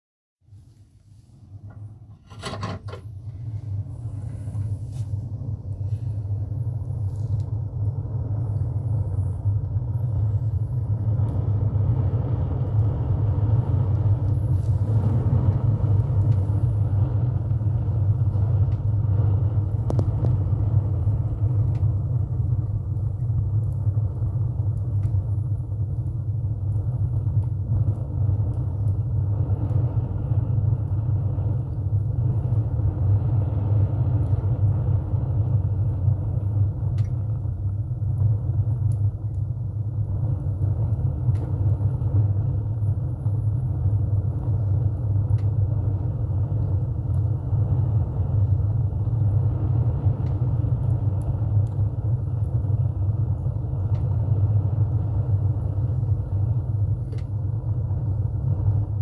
Opening up Woodburn door, flame whoosh building